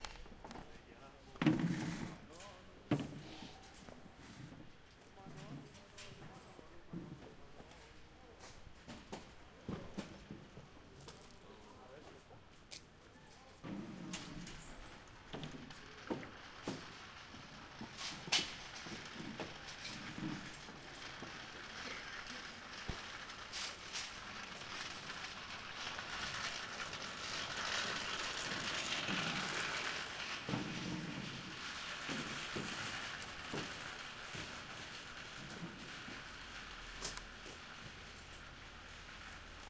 It's 7 o'clock a.m. . The merchants are placing their stalls as every Thursday.
Son les 7 del matí. Els veneros están posant les paradles com cada dijous.